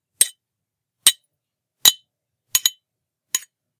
knife stabs
knife stab sounds. could be coupled with my "stab sound in this pack to get nasty stab sounds.
fight, hurt, impact, injure, knife, knife-stab, military, punch, slash, soldier, stab, sword, war, weapon